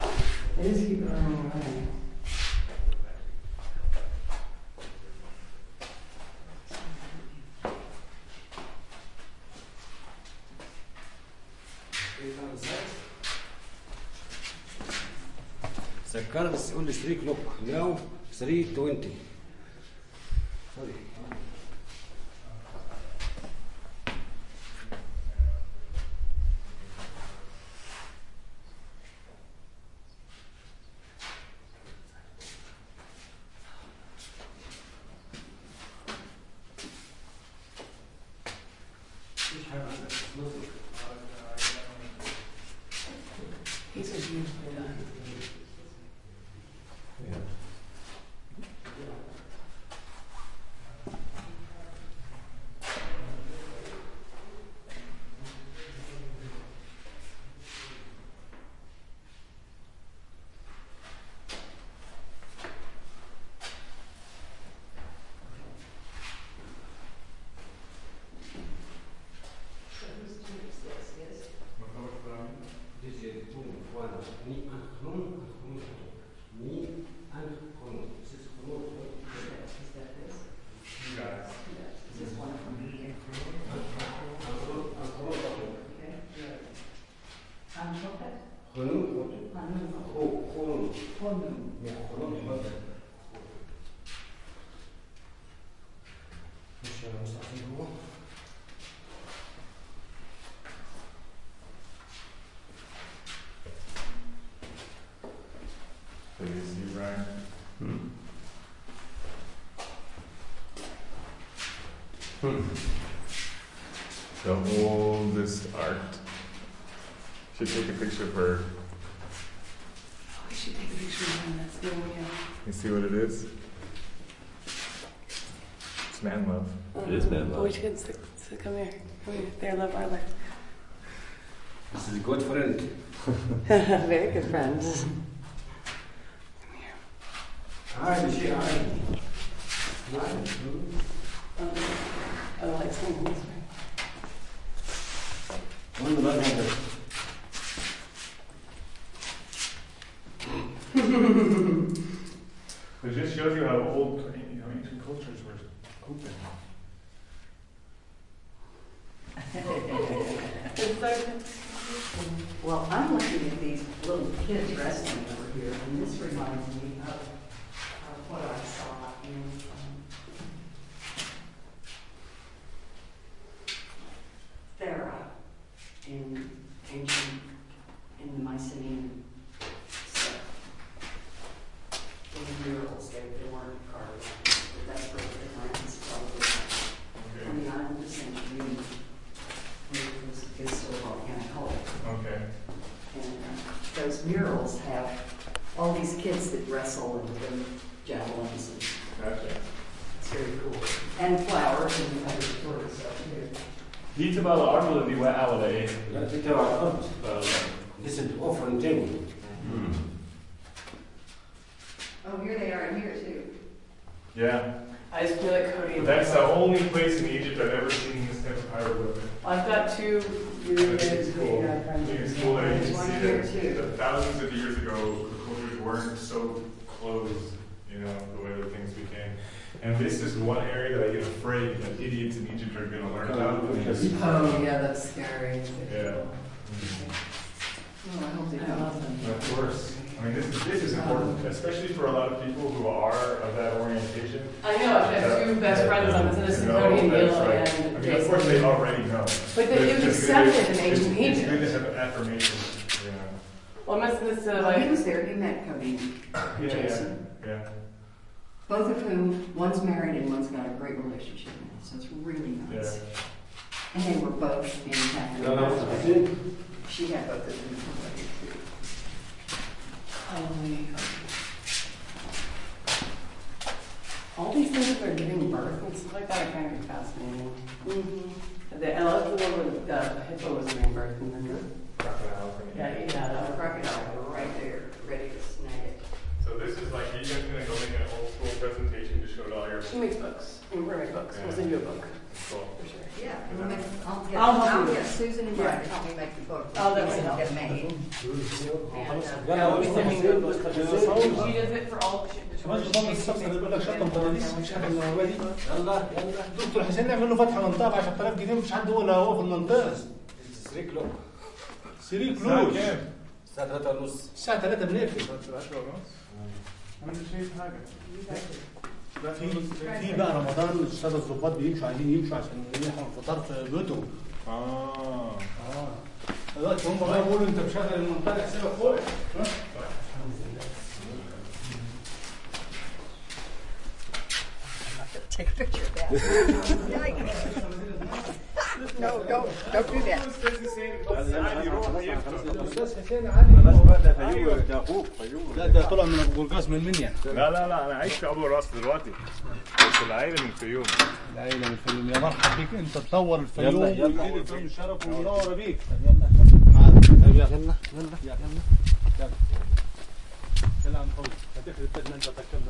saqqara tomb5
Exploring an ancient Egyptian tomb in Saqqara